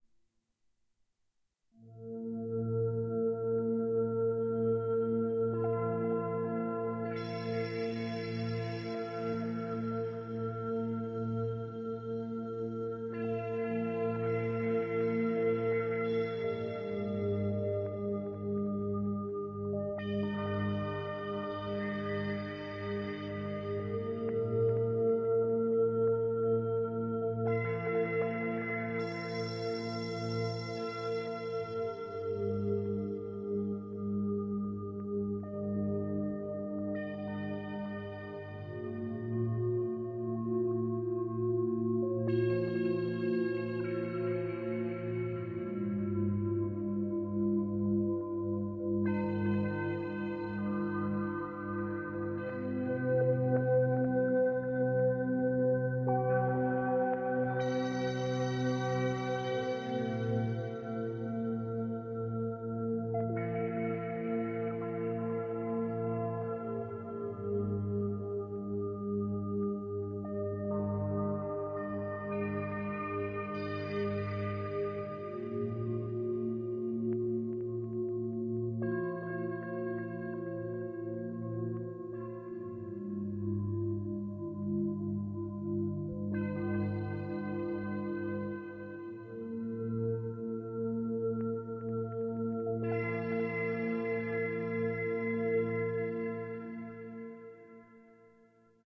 relaxation music #12
Relaxation Music for multiple purposes created by using a synthesizer and recorded with Magix studio.
Like it?
atmosphere, electro, music, noise, processed, relaxation, synth